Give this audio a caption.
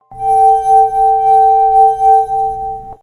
Great for magic circles in any game!